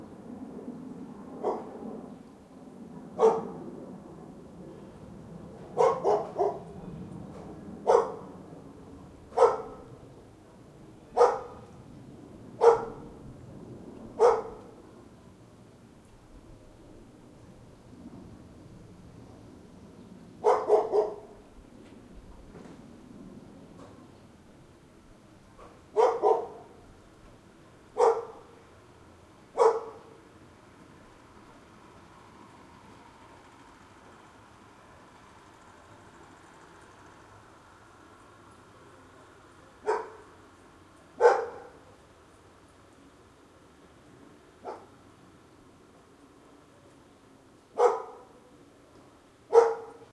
Tai O Dog Bark Plane Boat W
Stereo recording of a dog bark in Tai O, Hong Kong. Tai O is a small fishing village. It is famous for a very special life style, people living in some huts that built over a small river, just a little like in Venice. Since the village locates beneath the pathway of the airplanes that fly to and from the Hong Kong International Airport, a plane rumbling can be heard. Recorded on an iPod Touch 2nd generation using Retro Recorder with Alesis ProTrack.
hong-kong, dog-bark